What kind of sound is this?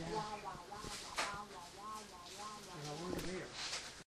newjersey OC gibberwa
Gibberish in Ocean City recorded with DS-40 and edited and Wavoaur.
wala, field-recording, ocean-city, gibberish